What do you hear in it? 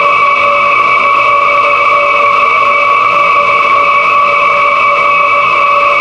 atmosphere noise 005
White noise processed with FIR-filter.